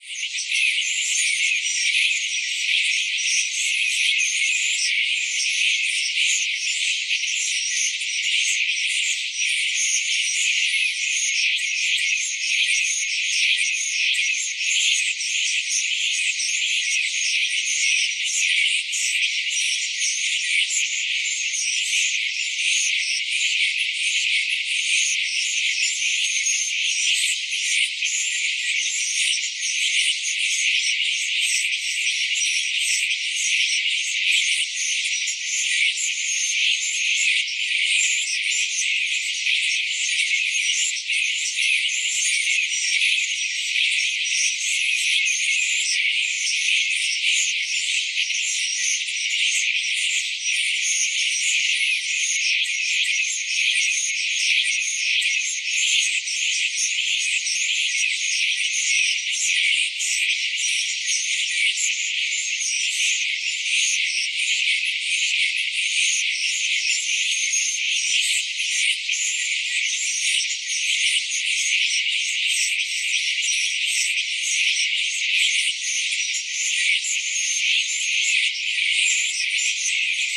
metasynth bugnite
Ah Metasynth! What once was a voice saying "Welcome to..." has become a swampy backdrop of synthesized...birds? frogs? bugs? Sounds good dropped an octave too. Have as much fun using it as i did making it! ~leaf